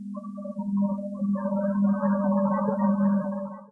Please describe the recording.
A flush sound with a "remove noise" effect, 1 second of echo and a fade out.